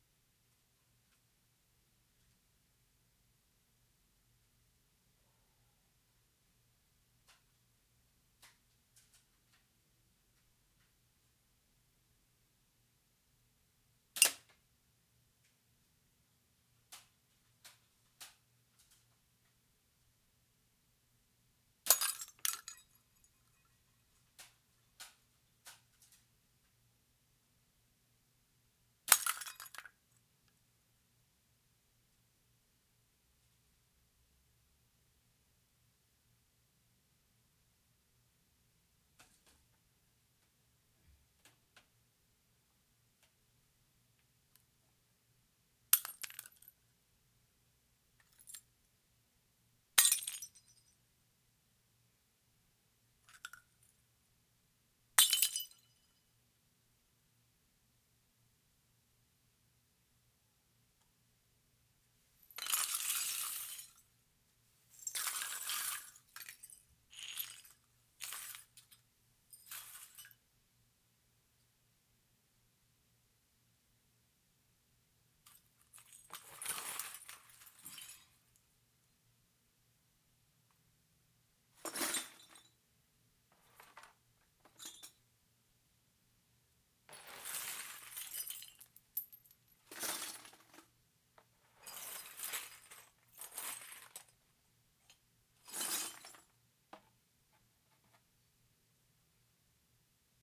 Lower velocity strikes to emphasize the falling glass sound and not so much the spray into the blast screen behind. Also less percussive pulse from the gun itself. I tried all different angles on microphone to give different perspectives since I am stuck with mono for now... that's monophonic.